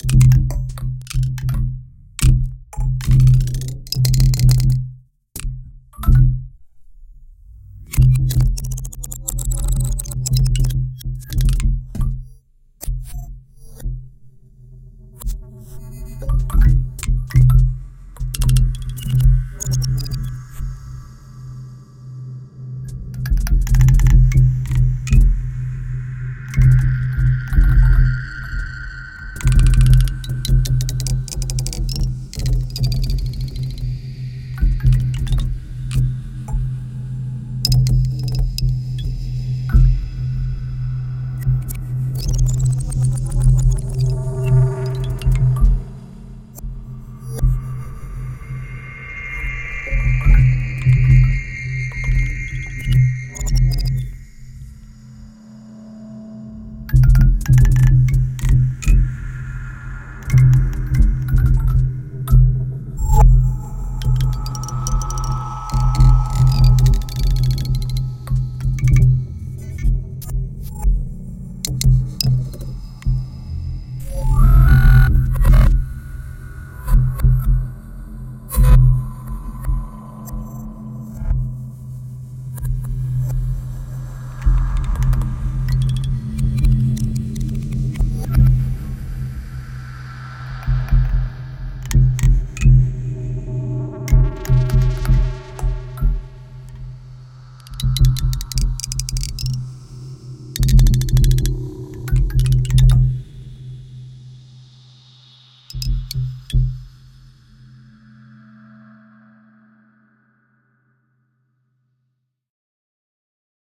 Sounds of a Litophone send through a Reaktor 5 graintwister - those twisted grains were also pitch transposed and send through a convolution reverb with the Impulse response of the tube of a vacuum cleaner. The resulting sum was then send through a self resonating delay mixed live with the grain sounds.
grains, unreal, sound-art, experimental, abstract, electronic, processed, soundscape, science-fiction